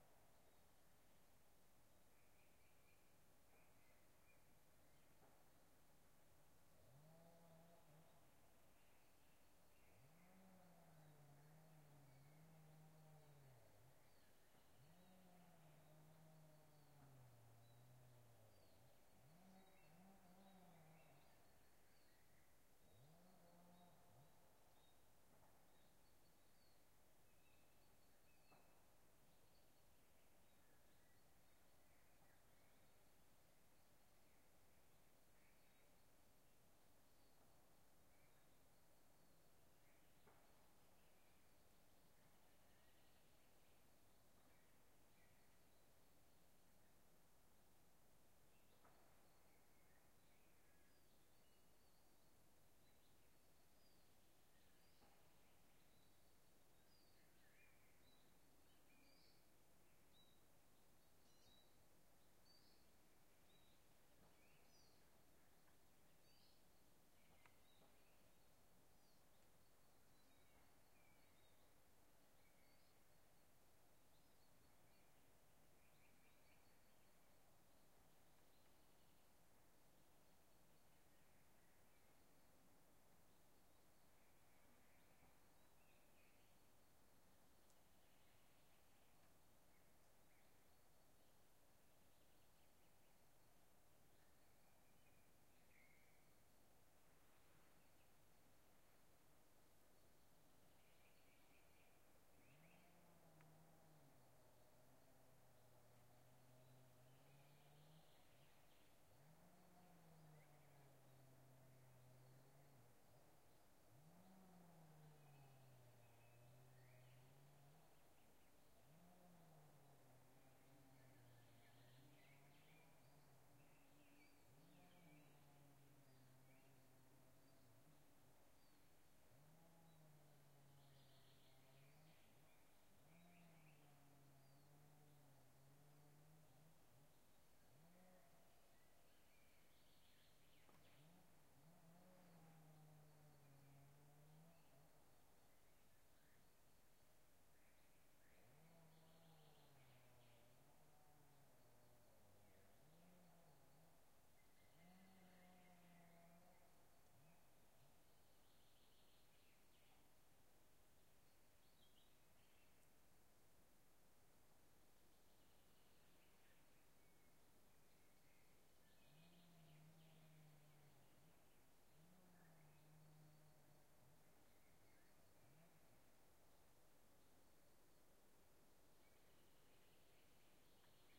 Nord Odal Nyhus 04 juni 2011 quiet forest birds insects distant chainsaw 01
quiet forest with nice birdsong. A forest worker with chain saw can be heard in the distance.
filed-recording, birds, forest, insects